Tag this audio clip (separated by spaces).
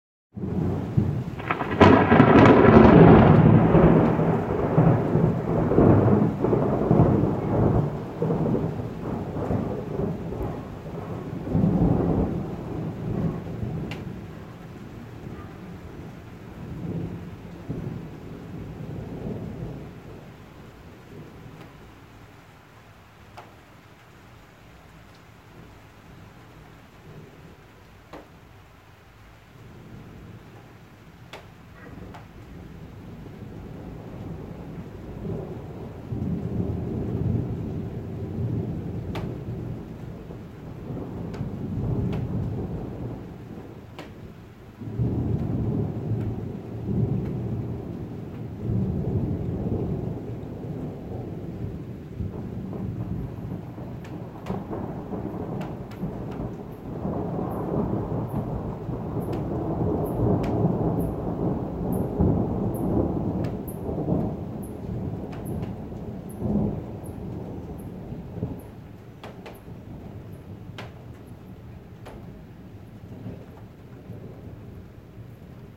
lightning weather thunderstorm storm rainstorm